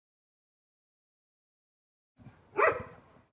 Dog barks once
Barks, Czech, Dog, Outdoor, Panska